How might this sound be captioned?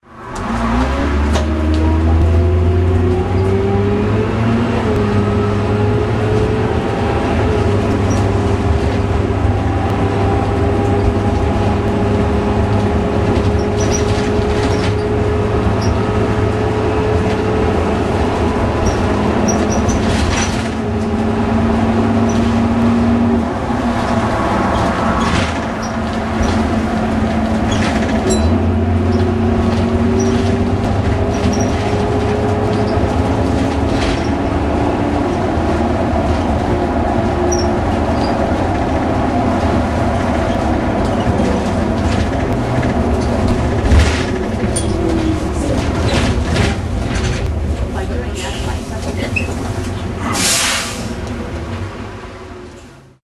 bus movement 1
Bit of Dennis Dart sporting a nice Cummins engine anyone? Recorded in Plymouth ages ago, I can't even remember which bus I was on, apart from it was First.
cummins, bus, engine, national, plymouth, first, dennis, devon, dart, western